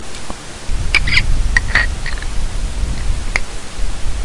fist clenching (1)
Just made some anime style fist clenching sounds cause I wasn't able to find it somewhere.
anger, anime, clench, clenched, clenching, fight, fist